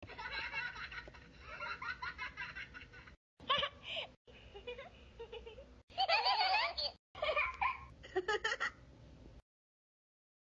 A collection of children's toys that play audio of laughing edited together. Low quality, because they come from two cent speakers. Five laughs, one is pretty common but the others are specifically from the toys I recorded them from.